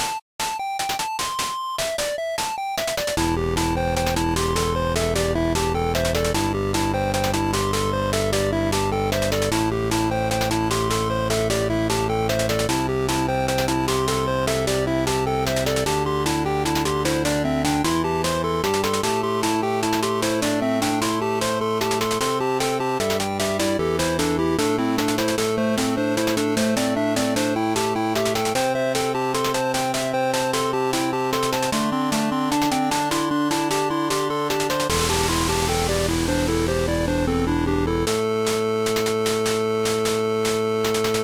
Pixel Song #21
Happy, Music, Pixel